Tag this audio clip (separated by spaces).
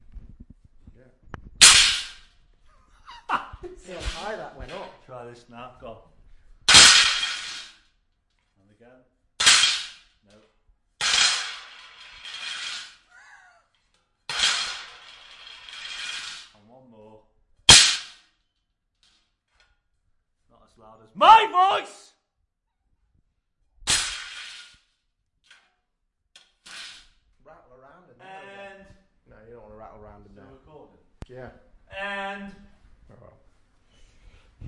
Cutlery Destruction Smash